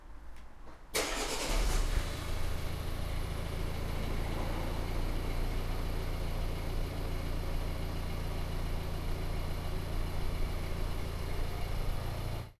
Car starting, recorded from garage
A car being started. It was recorded from outside the car, standing in the garage. I believe the make is a Volvo V70 but I'm not entirely sure as it is not my car.
engine, vehicle